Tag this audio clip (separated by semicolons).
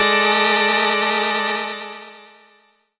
chord; dissonant; multisample; ppg